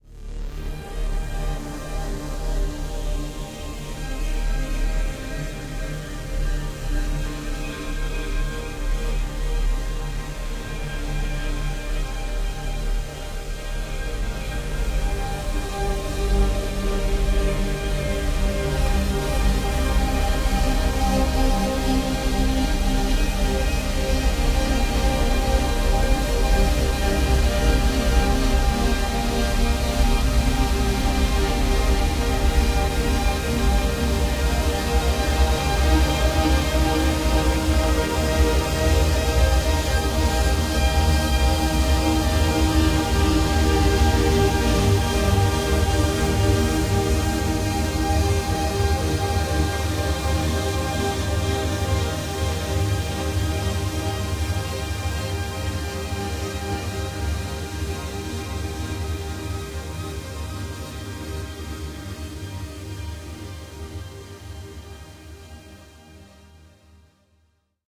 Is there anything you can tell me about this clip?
Diamond-Scape
This was meant to be a part of a track I was working on, but ended up getting caught up in something else. So, I decided to give this away to those of you who may have use for it. The most important effect used to make this was Absynth 5's Atherizer.
Background, Ambient, Soft, Sound-effect, Synth, Digital, Atherizer, Musical, Soundcsape, Minimal, Film, Atmospheric